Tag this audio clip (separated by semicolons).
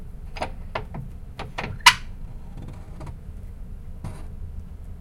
bolt; clang; iron; metal; metallic; shiny; squeak; steel